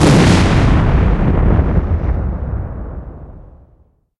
Grenade Fire Eplosion 05
Synthetic Sound Design, Created for an FPS shooter.
Credits: Sabian Hibbs Sound Designer
:Grenade Launcher FPS:
Action, effects, fire, Firearm, FX, Grenade, Gun, gunshot, Launcher, SFX